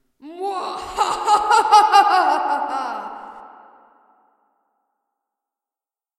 evil laugh 5
Evil laughter recorded for a production of Sideways Stories from Wayside School. Reverb added.